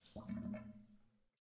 Sink BLOP sound 2
Second blop sound of bathroom sink after draining water out.
Bathroom, BLOP, Drain, Field-recording, Sink, Water